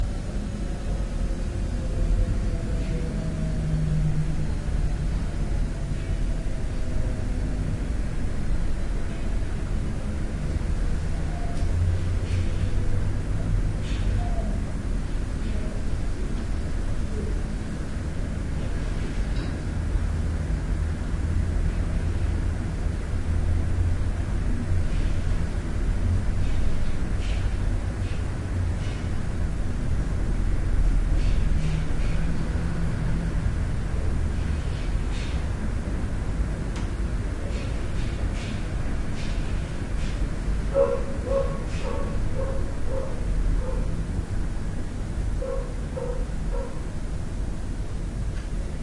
stereo ambient room kitchen indoors
ambient, indoors, kitchen, room, stereo